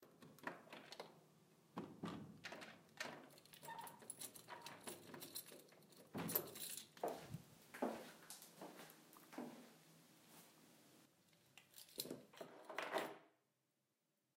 For this sound, I simply wanted to transcribe the sound of a banal and everyday scene: when we go home. To do so, I recorded 3 sounds (door lock and door that opens, footsteps, and keys that are placed on a table). By associating them and putting some effects of echo and reverberations on the steps and the sound of the lock, we arrive at this result.
Analyse selon la typologie de P. Schaeffer :
Code typologique de Schaeffer : X’/X ‘’
Masse : sons cannelés
Timbre harmonique : terne mais tonique
Grain : rugueux
Allure : pas de vibrato
Dynamique : abrupte et violente
Profil mélodique : variation sclaire
Profil de masse : calibre avec équalisation sur les clés